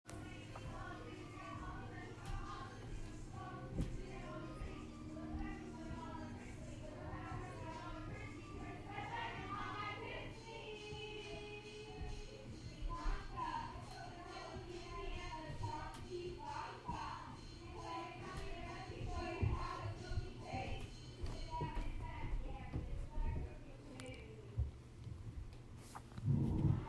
Karaoke upstairs 1
People singing karaoke in another room, while you lay downstairs.
people-in-another-room, ambiance, voices, ambience, ambient, noise, field-recording